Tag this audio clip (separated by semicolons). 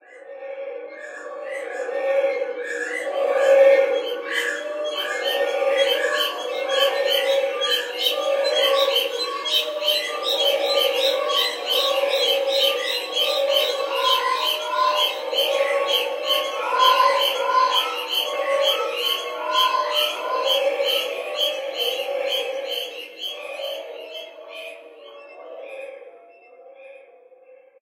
pitchshift; blip1; experimental